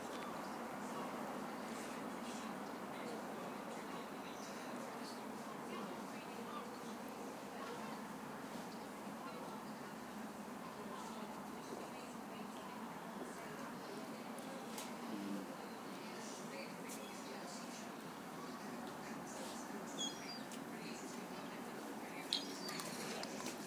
Harbour Dock Ambience 01
I created this sound at a harbour in the UK
seagulls, ambience, harbour, dock, harbor